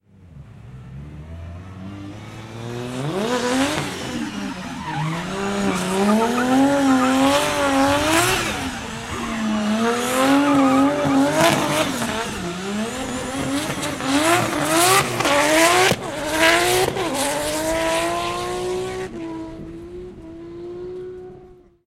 Drift race car, Donut
Close proximity, from idling pack, performance car starts, immediately executes a 1080-degree donut, and travels to distant first corner. Revs and squeals.
Stereo XY coincident pair, 44k1Hz@16-bit
Recorded at Hampton Downs Motorsport Park & Events Centre, Dec 3, 2022.
Event: Mad Mike's Summer Bash.